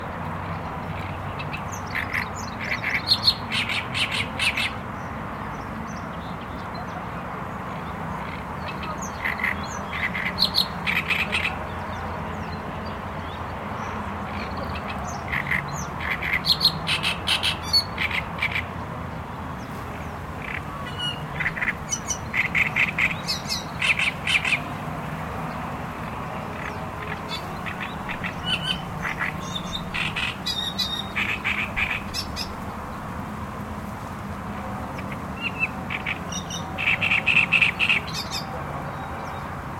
Omsk Victory park 10mono
Athmosphere in the Victory park, Russia, Omsk. Slightly into the interior of the park, lake wetland. Loud sound of bird. Hear noise of cars from nearby highway.
Mono cardioid.